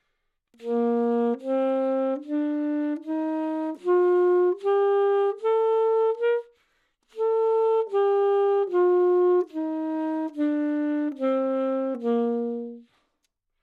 Sax Alto - A# Major
Part of the Good-sounds dataset of monophonic instrumental sounds.
instrument::sax_alto
note::A#
good-sounds-id::6805
mode::major
alto, AsharpMajor, good-sounds, neumann-U87, sax, scale